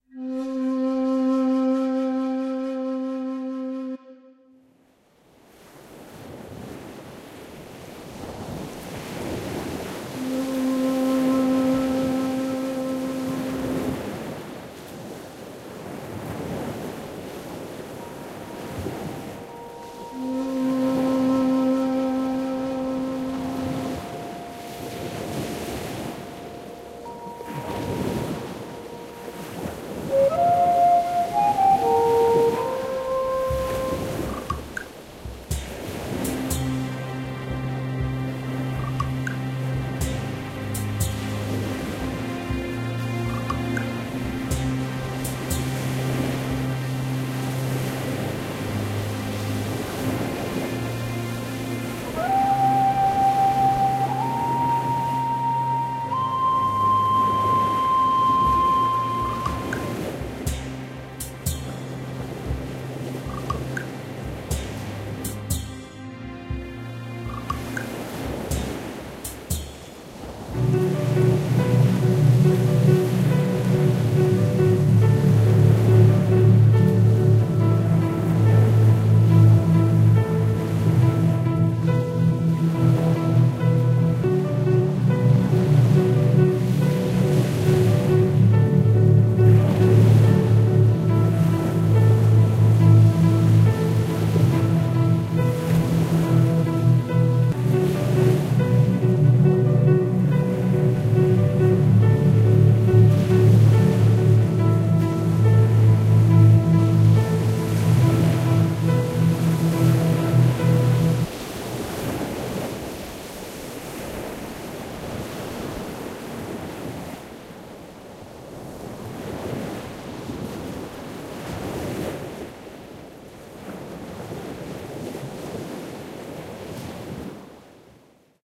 This track was created by Tiz Media as an experiment in creating music that may be used by our students for meditation.
earth, meditation, peaceful